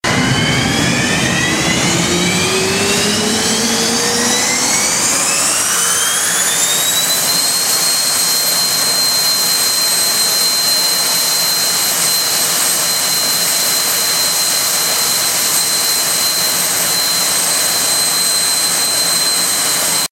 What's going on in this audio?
jetcar start01
startup of a jet powered race car
audio ripped from HV40 video using Premiere Pro CS6
taken at Alaska Raceway Park